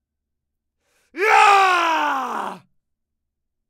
Warcry Yarghh! - British Male
A British military character shouting a warcry during battle.
Perfect for an evil warrior, a hardened fighter, or a fierce knight.
male, voice, voice-acting